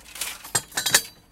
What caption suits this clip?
Metal tools pliers handling and dropping
Dropping a metal tool